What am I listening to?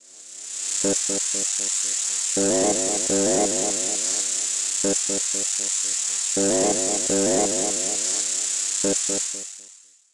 kaos ambience 3
Background sounds - experiment #3